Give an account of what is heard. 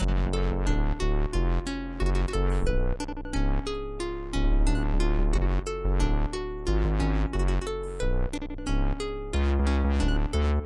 fake sitar and bass for a strange electro song!